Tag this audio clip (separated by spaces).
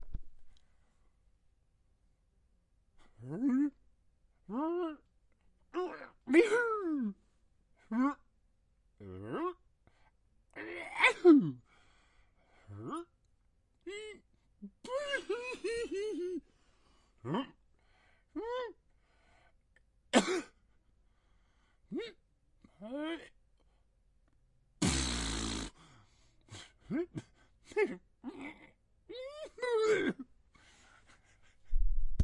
funny cartoon throat man cough sneeze spit goofy exhale ill choke male silly voice sick